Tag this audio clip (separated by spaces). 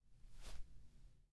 lamb Foley wool